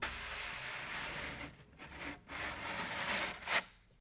Scrape or slide